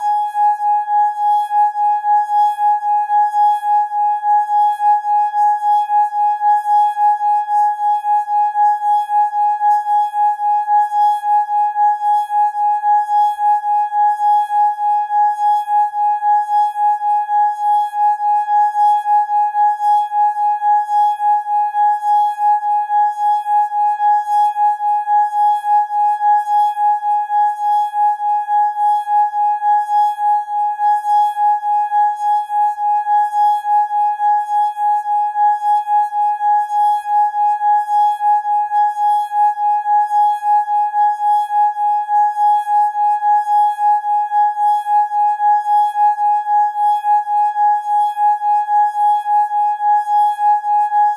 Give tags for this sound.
sustained tuned wine-glass instrument drone tone water clean melodic loop note glass

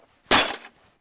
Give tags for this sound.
shattering window glass

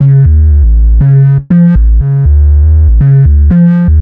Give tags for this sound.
electronic loop bass

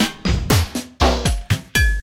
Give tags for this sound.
120BPM drumloop rhythmic

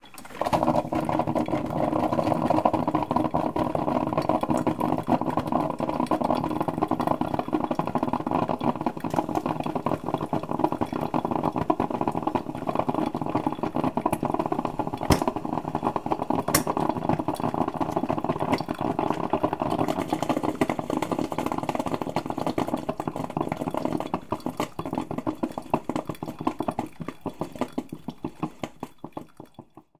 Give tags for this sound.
brew stereo maker

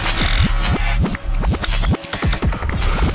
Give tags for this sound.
from Other Sounds Worlds

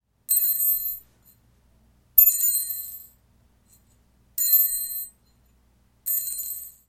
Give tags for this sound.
bell ring shiny

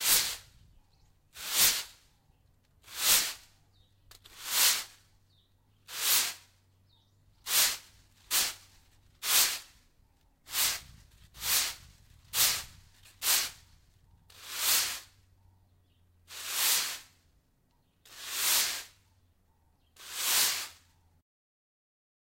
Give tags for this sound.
Broom Pavement

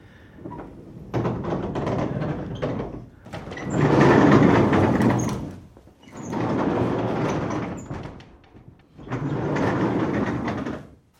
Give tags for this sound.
Barn Sliding barn-door close closing gate large sliding-barn-door sound wood-sliding wooden wooden-door workshop-door

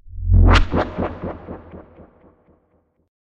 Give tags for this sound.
effect fx impact sfx swoosh transition whoosh

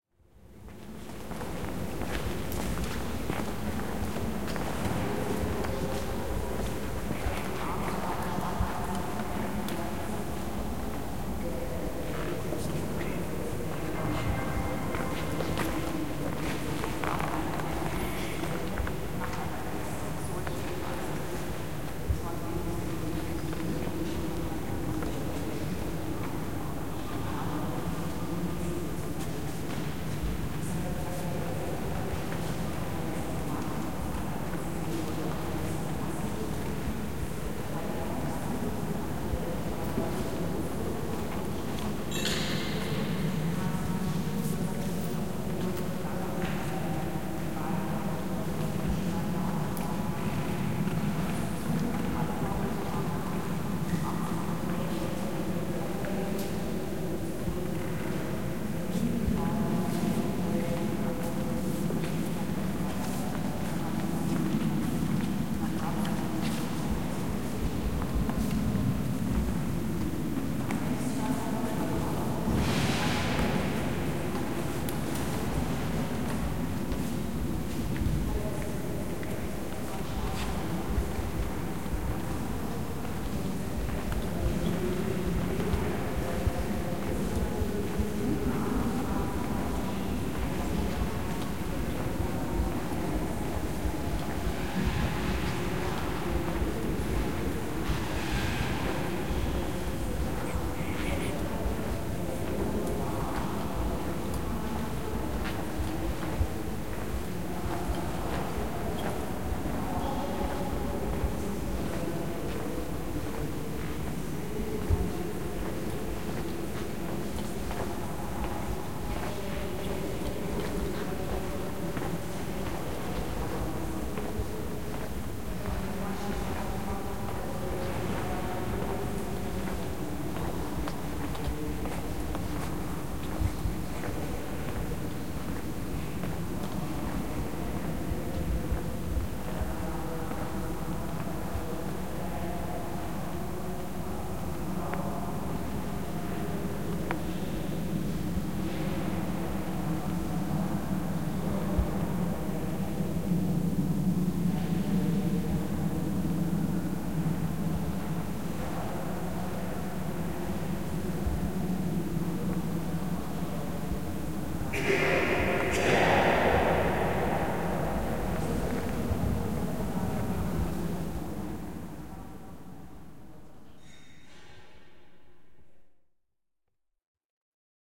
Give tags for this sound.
ambiance ambience atmo atmosphere background-sound cathedral church crowd guided-tour visitors

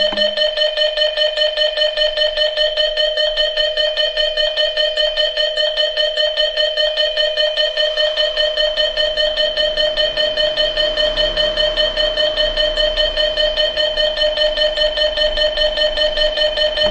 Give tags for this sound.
alarm emergency siren warning

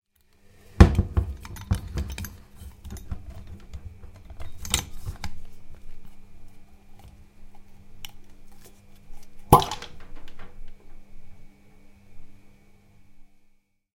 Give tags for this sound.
bottle open wine wine-bottle

